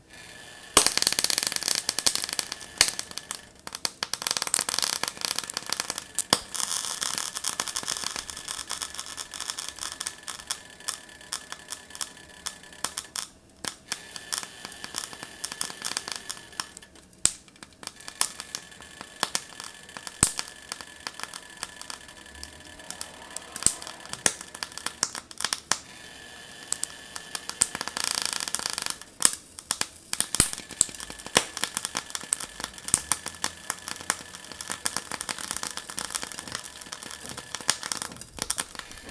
This is cooking a homemade vegan burger in a frying pan with a lid on. The pops are water droplets falling from the lid and hitting the hot oil in the pan. The background noise is in fact my electric stove regulating the heat. This is a longer group of strange sounds of water dancing on the hot oil.